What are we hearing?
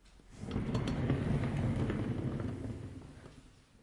An office chair rolling on a hardwood floor.